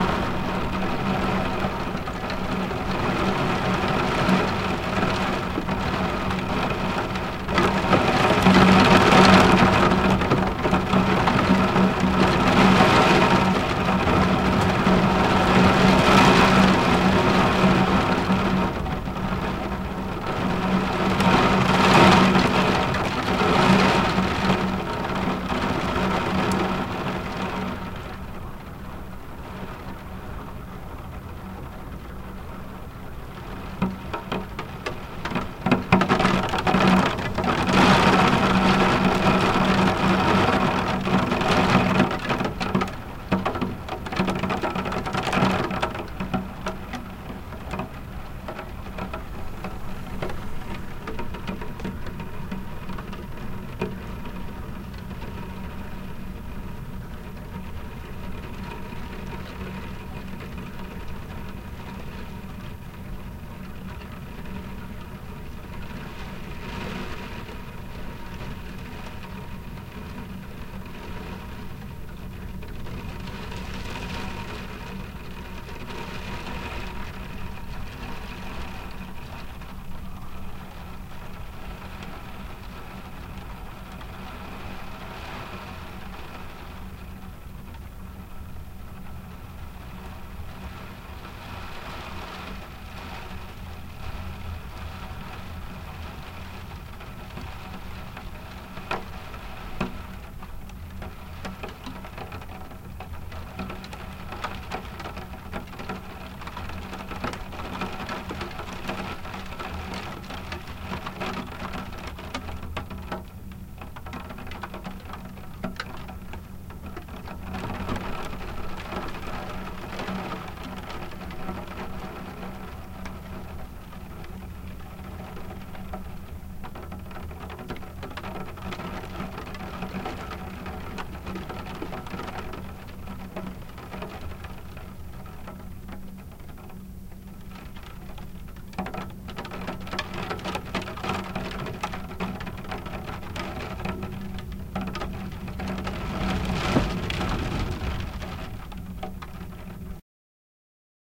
Heavy rain on dormer / skylight